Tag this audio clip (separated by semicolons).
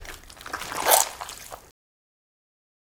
guts; pumpkin; squish